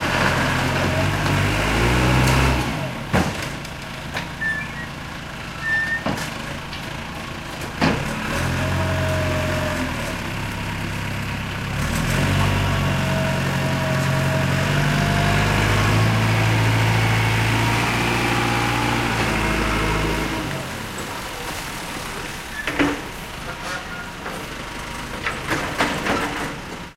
JCB operating on construction site
JCB operating on small construction site
Building-Site, JCB, Construction, Construction-Site